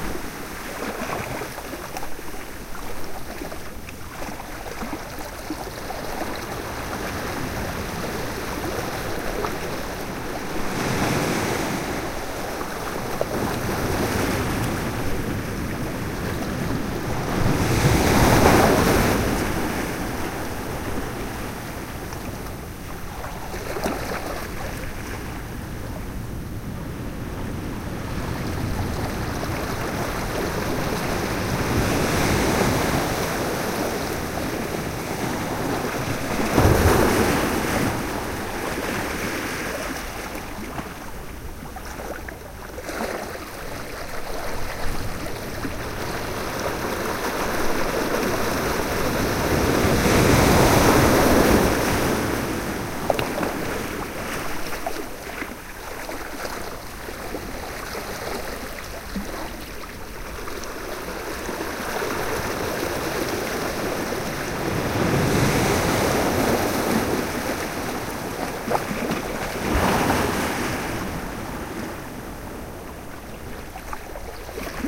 Ocean waves at Point Reyes.
oceanwaves-6&7 are from different parts of the same recording and edited to be combined and looped.
field-recording, ocean, slosh, beach, water, stereo, sea, splash, loop, wet, close, seashore, Point-Reyes, waves